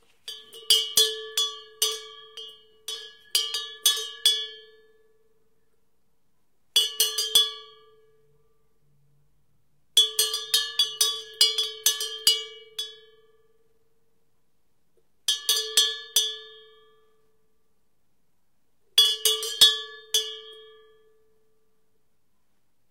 Muffin Man Bell - Cow Bell
Its thin metal cow bell recorded with a ZOOM H4N. It was a present from my recent show at Canberra REP - Gaslight 2015. It could be used as a muffin man bell - could a muffin man in victorian London afford a big bell?